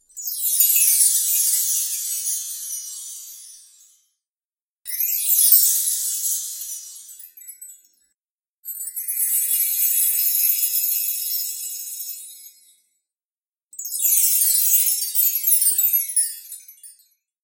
Magic Wand Glitter
Magical wand spell casting sound. Cartoon-friendly.
Magical hat